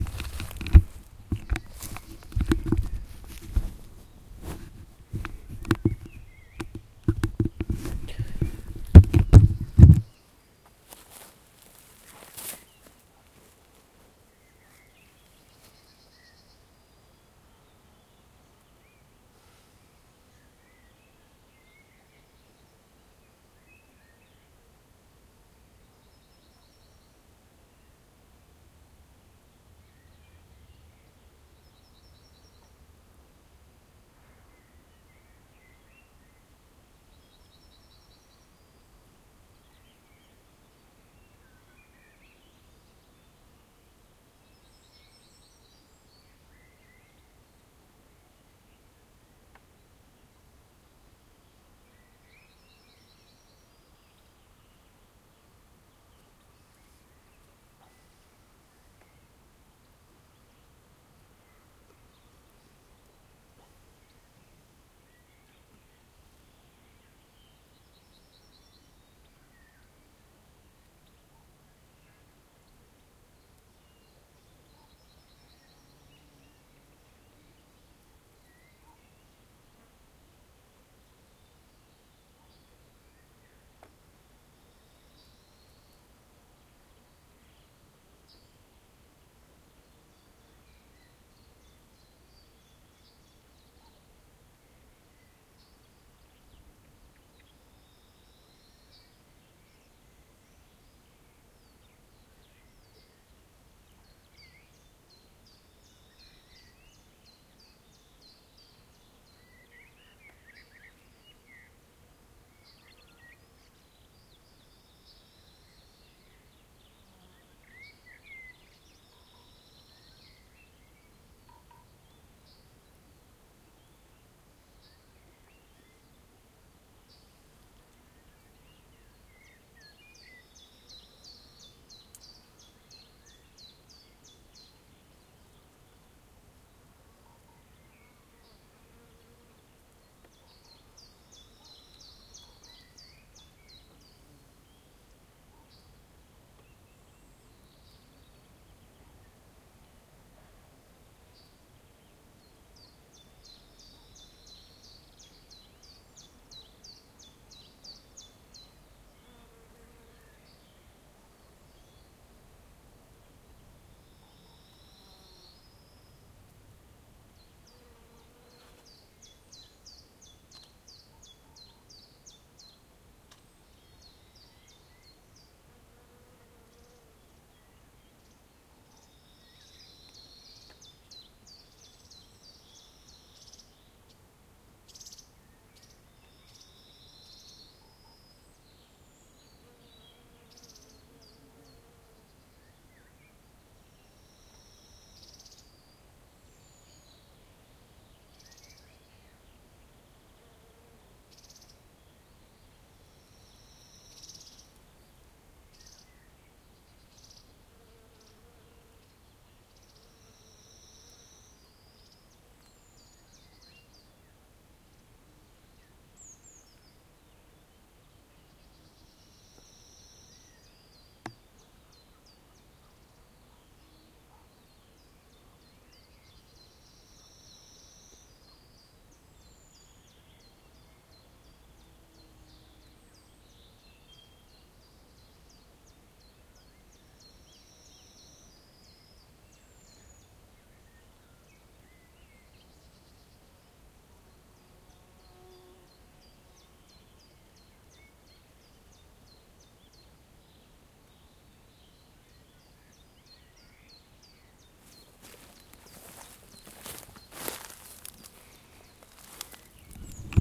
next spring day in the polish forest - front
next spring day in the polish forest
bird, birds, birdsong, countryside, day, field-recording, forest, morning, nature, next, poland, polish, spring